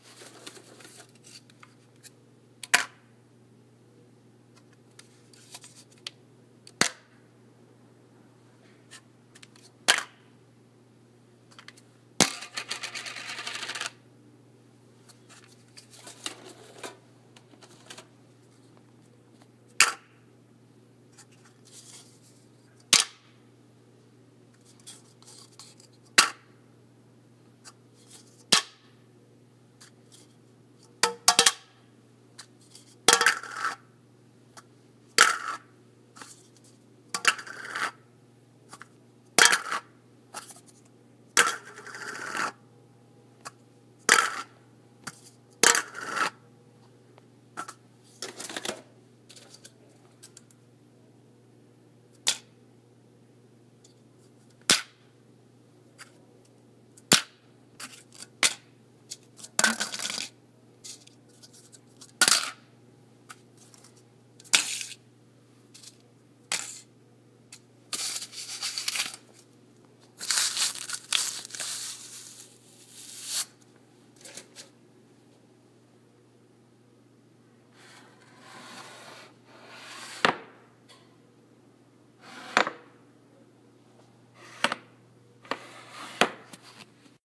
various sounds of different kinds of lids being dropped onto a faux wood countertop; towards the end you can hear a couple times where the glass sugar dispensers clinked together.